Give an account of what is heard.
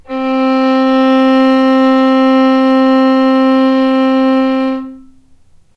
violin arco non vib C3
violin arco non vibrato
violin, arco